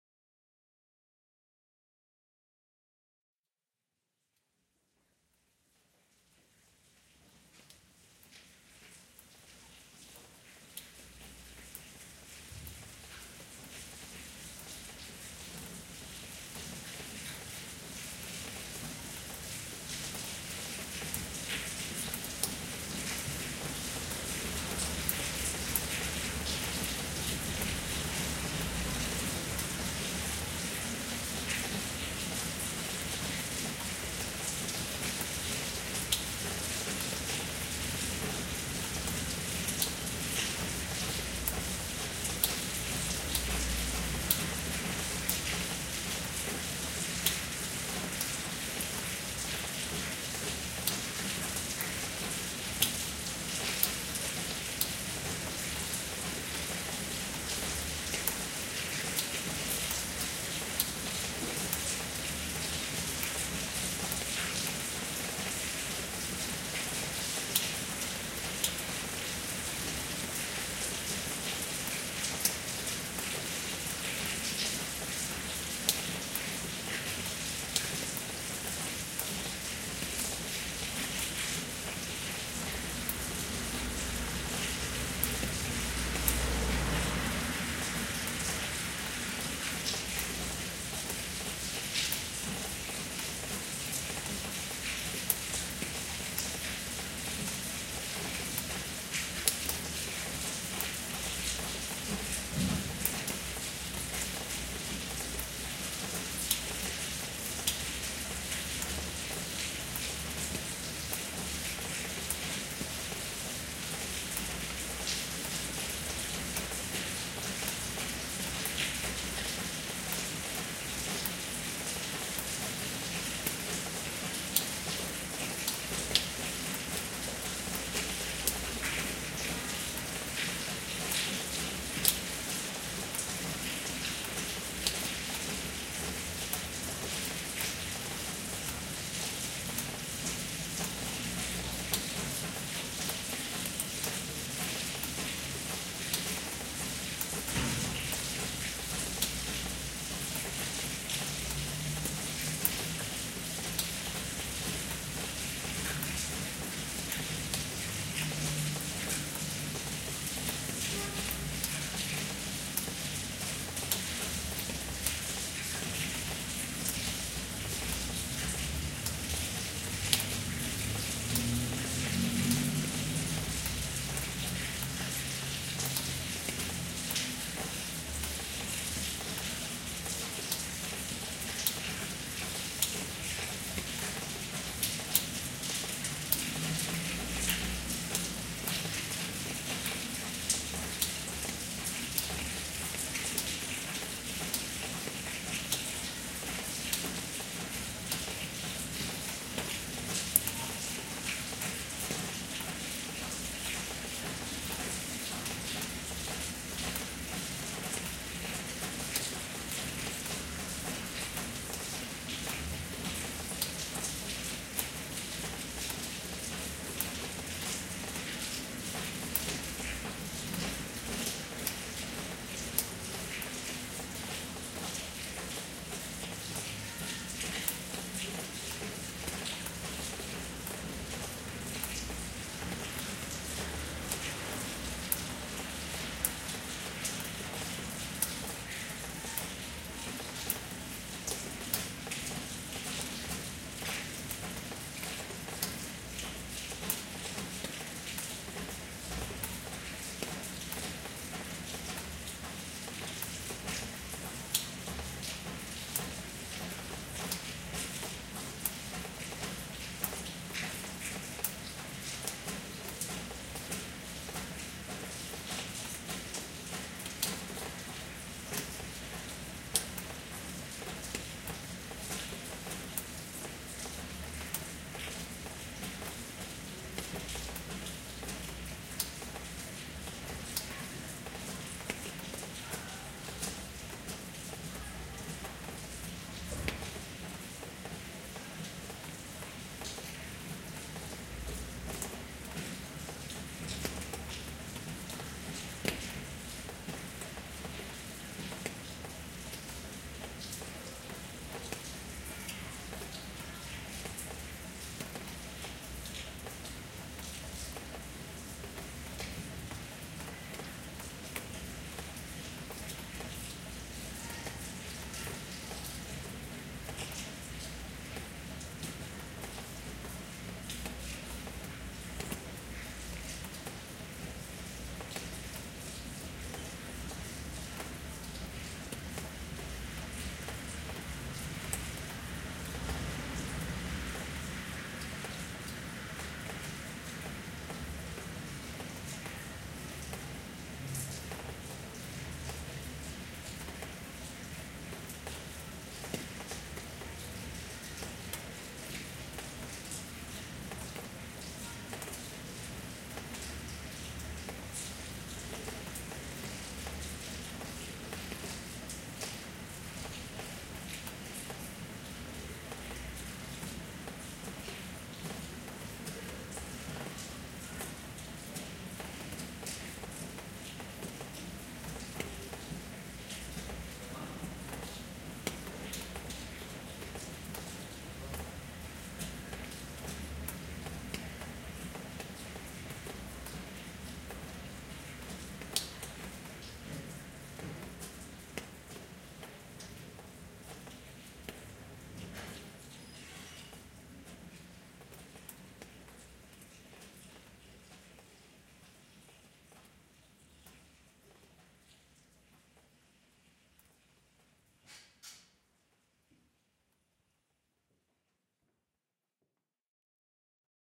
[008] Via Giovanni Bovio (Evening/Rainy)
date: 2011, 30th Dec.
time: 06:20 PM
gear: Zoom H4 + Rycote MINI Windjammer
place: Castellammare del Golfo (Trapani)
description: Recording from inside to the Via Giovanni Bovio while it's raining.
Castellammare-del-golfo, Trapani, bells, drops, people, rainy-day, rural